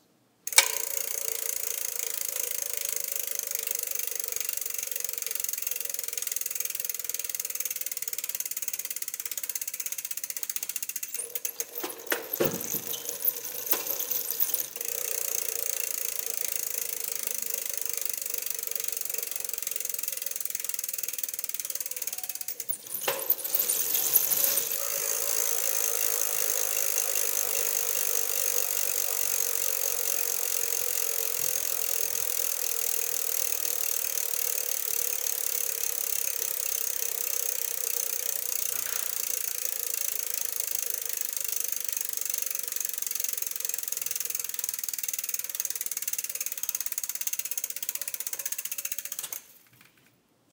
Bicycle rear wheel spinning freely, multiple speeds